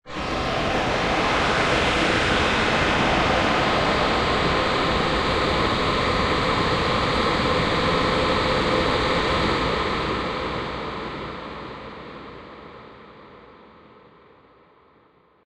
Result of a Tone2 Firebird session with several Reverbs.